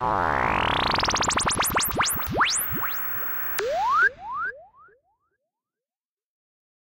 Trap Digital Shot 10 A million bubbles
Bubbly computer riser sound effect
trap, effect, sound-fx, sound